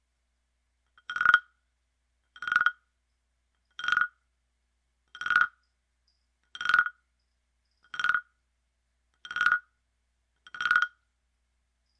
Wooden Frog "Croaks"
Eight "croaks" on a wooden frog guiro, recorded on Samson Q2U. All "croaks" here are made by dragging the playing stick up the frog's back in the same direction each time.
wooden, wood, instrument, guiro, frog, percussion